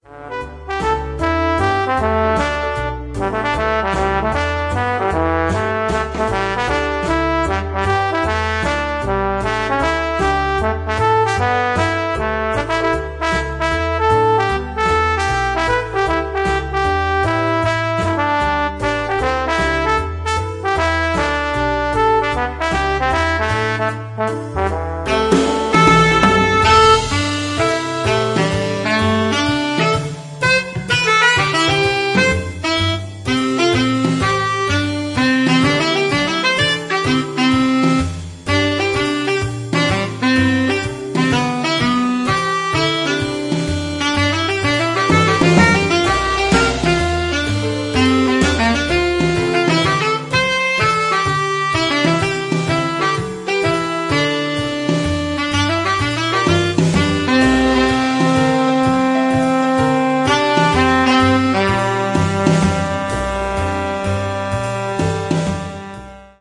Big Band Jazz Swing Theme
A big band style jazz song.